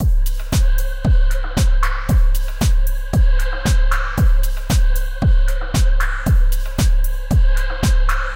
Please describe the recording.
techno-x--chor
techno loop with vocallike choir noise